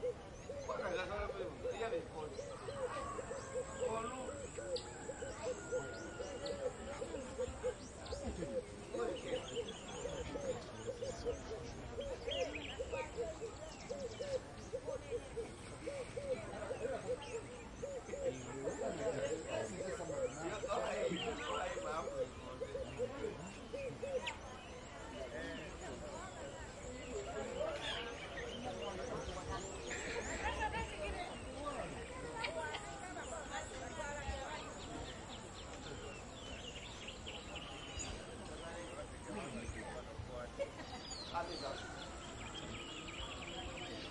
village crickets pigeon birds distant voices Uganda
birds, crickets, distant, MS, pigeon, Putti, Uganda, village, voices